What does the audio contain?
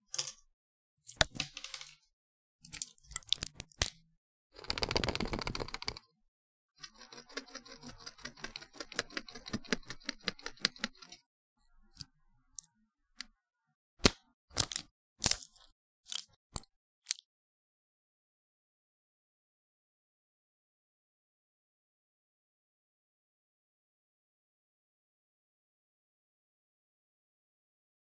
A foil/plastic packet of ibuprofen being shaken, popped, tapped, scraped and crumpled.
Microphone: Zoom H2